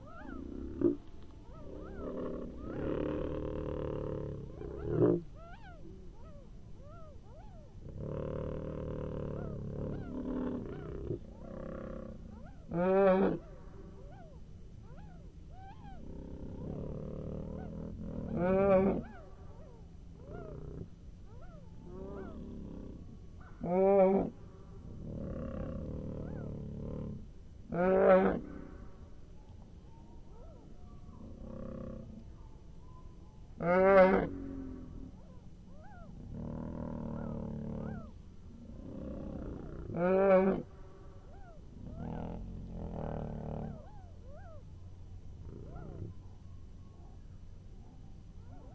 jurassic sound
Sounds of a nest of dinosaurs as it might have been heard some million years ago. Roaring of the adults and calls of the nestlings. In fact these are different sounds of Snow geese (chicks and adults)at reduced speed of about 30 % of the original. Zoom H4n
jurassic, rex, roar, scary, t-rex, tyranousaur